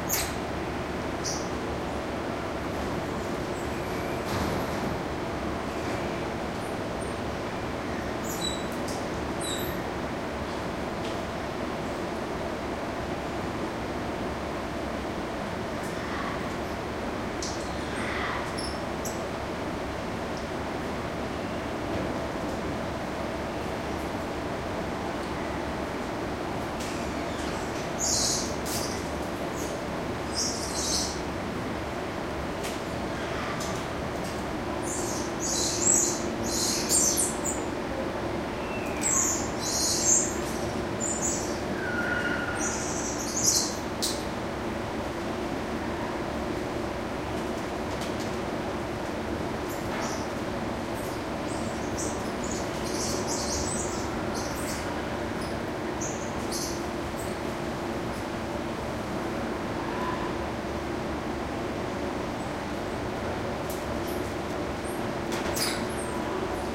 squirrel monkeys01
Squirrel monkeys calling and moving around their indoor exhibit. Recorded with a Zoom H2.